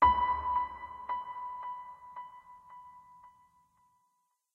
One high pitched tone, part of Piano moods pack.
delay, piano